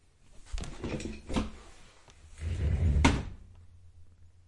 10 Office chair - slip in to office table
Office chair - slip in to office table
table, slip, office, chair